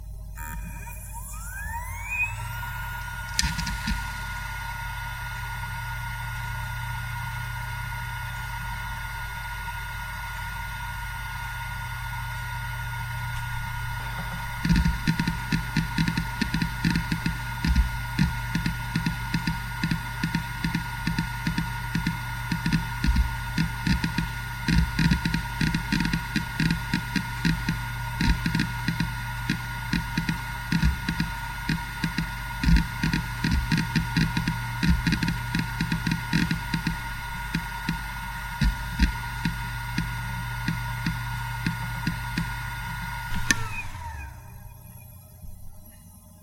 Samsung P80 - 7200rpm - FDB
A Samsung hard drive manufactured in 2004 close up; spin up, writing, spin down.
This drive has 1 platter.
(samsung sp0802n)
samsung, hard, drive, disk, motor, hdd, machine, rattle